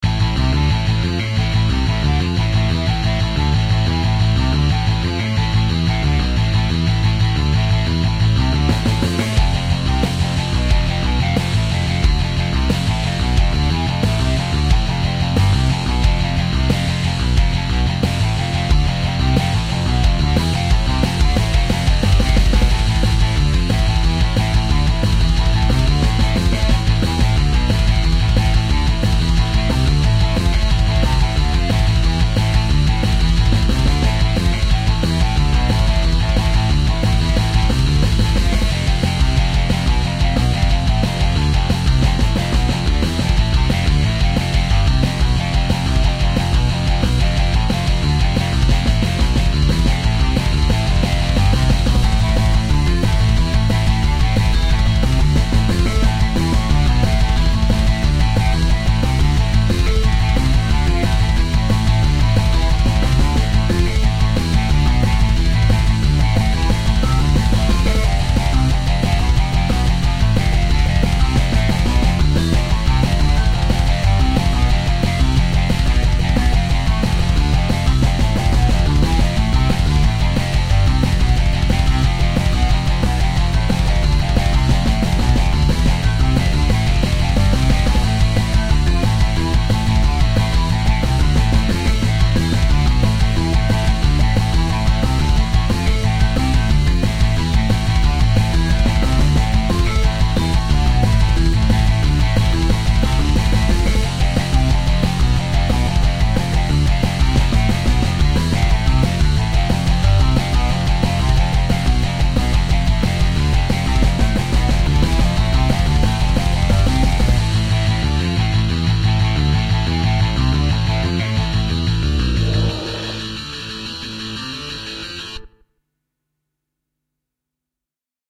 heavy metal (looping)
action, adventure, boss, fast, free, guitar, heavy, loop, looping, loops, metal, music, rock, rythem, rythum, soundtrack, space, synth, synthetic, teaser, thrash, trailer, virtual